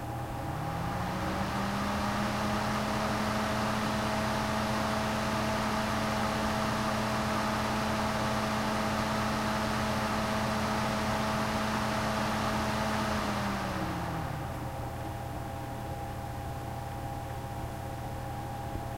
Recorded the sound of my CPU fan starting and shutting off.
Recorded with a Zoom H1